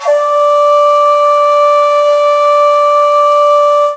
Created with audacity. I used different samples for attack and sustain of the flute and mixed them and tuned it at 587hz (D5)
Bamboo-Flute, Ethnic, Flute, Japanese-Flute, Shakuhachi